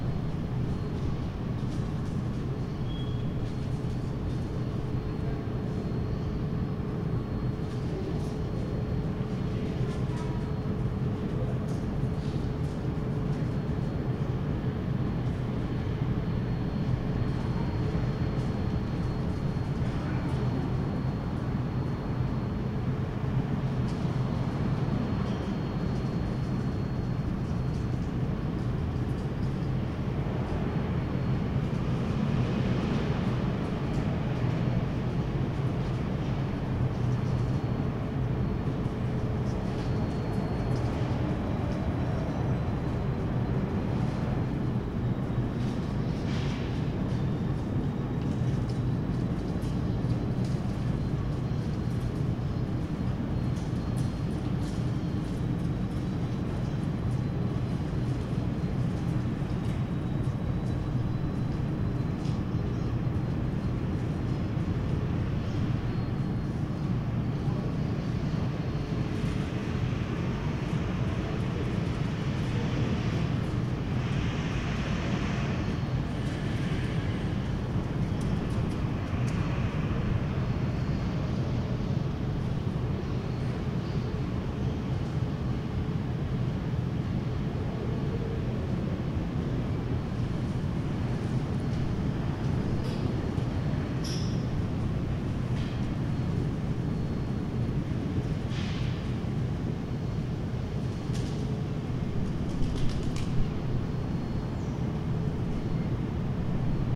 Ambi Empty Train Station
Ambiência captada no pátio vazio da Estação de trem de São Carlos.
ambience, train-station